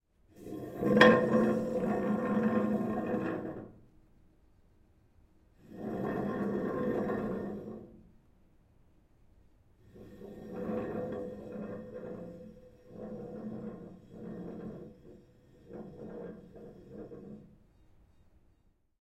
Moving chair
Recorded with a zoom H6. Dragging a chair across the room on a hard floor.